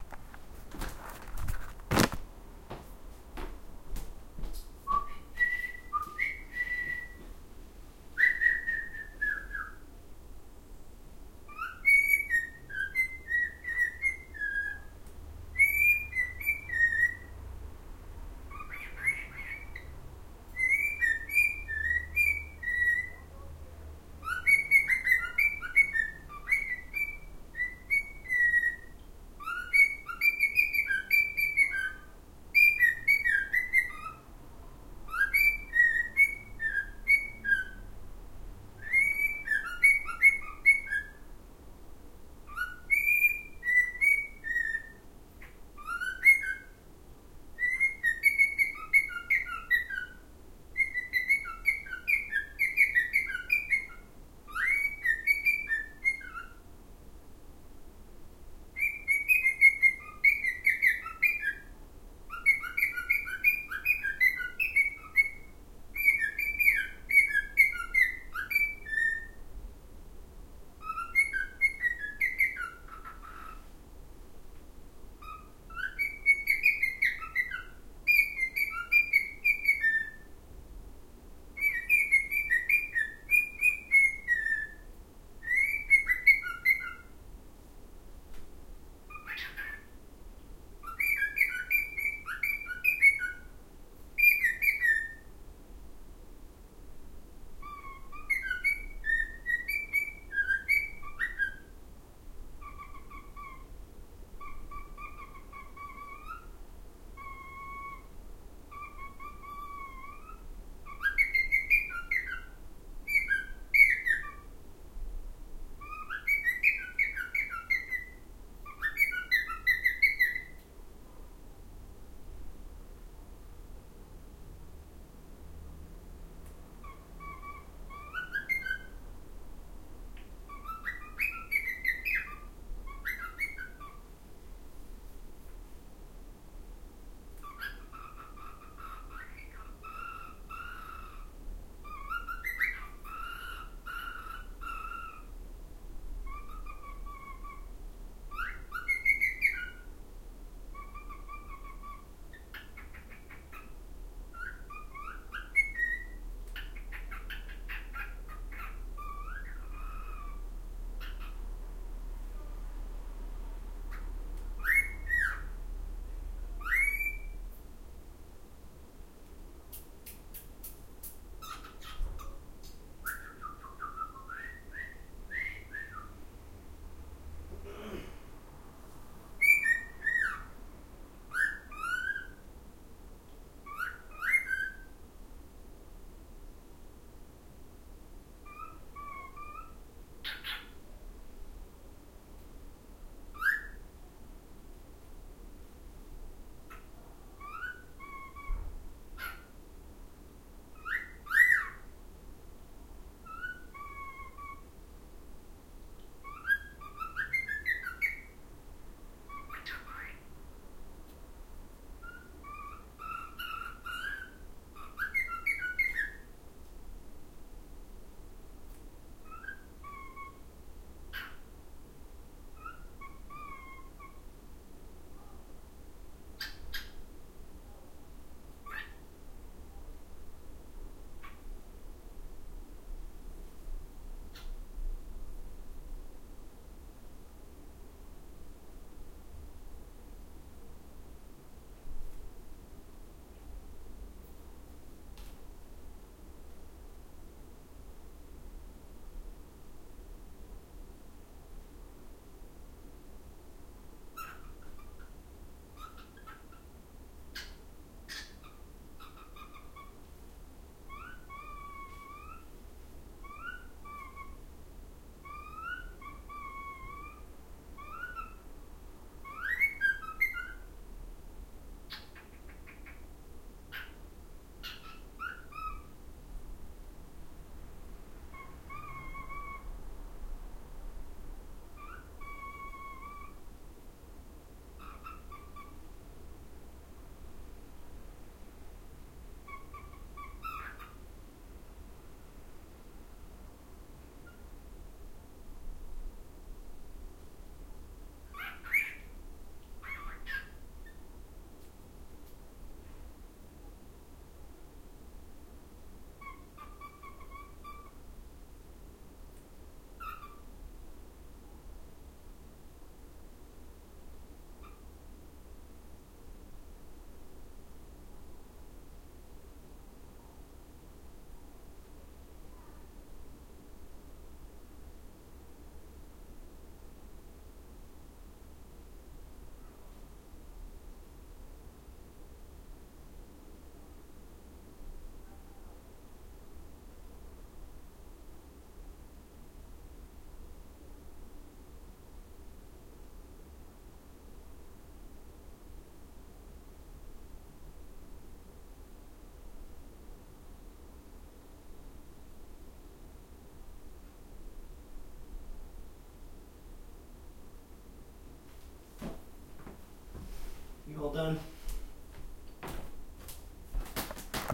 My spouse got this recording for me for one of my projects. We were at our noisy downtown Boston apartment, so there is a lot of reverb and outside noise. Dug makes a variety of noises pretty consistently during this 6 minute recording, but he is more tame and reserved than other cockatiels I've met (luckily for our sanity!).